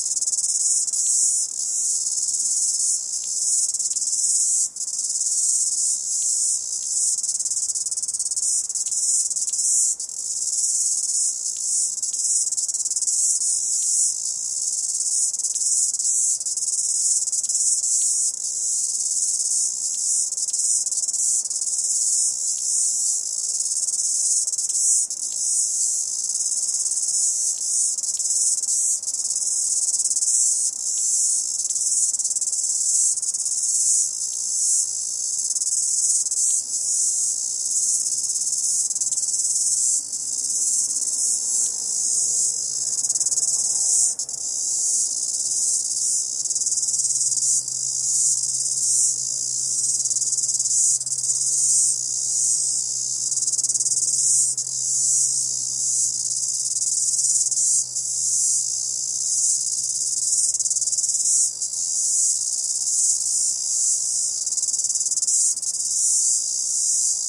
A tree of 3 or more cicadas chirping.
XY coincident recording.
insect, cicada, chirp